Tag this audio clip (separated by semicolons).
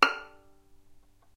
violin; vibrato